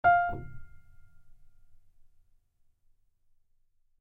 acoustic piano tone
acoustic, piano, wood, realistic